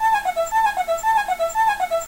Looped elements from raw recording of doodling on a violin with a noisy laptop and cool edit 96. Descending quadruplets.
descending, loop, noisy, quadruplet, violin